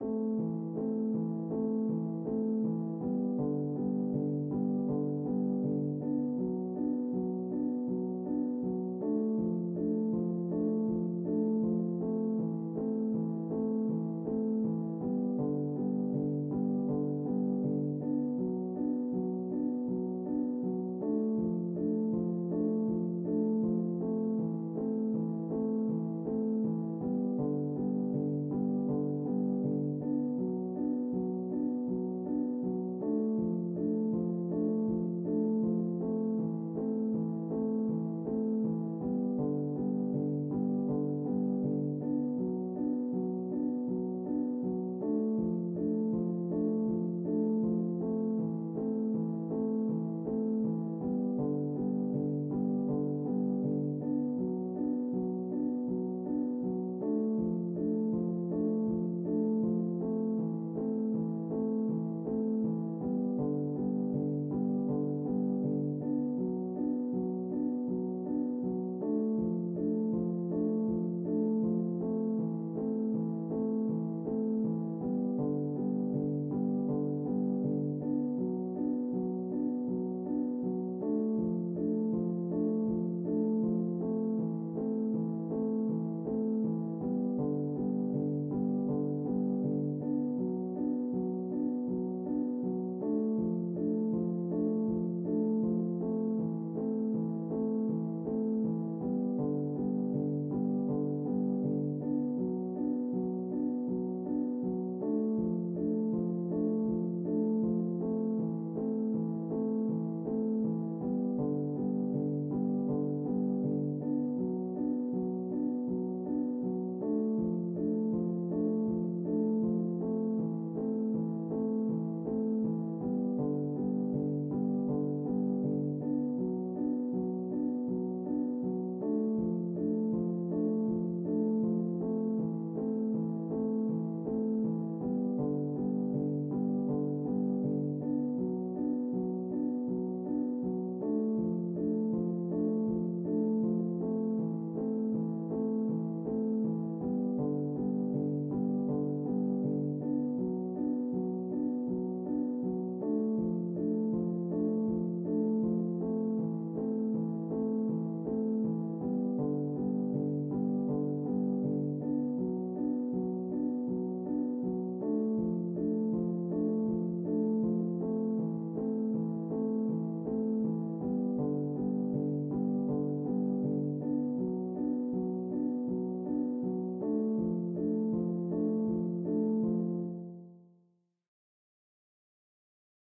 Dark loops 049 piano 80 bpm
80, 80bpm, bass, dark, loop, loops, piano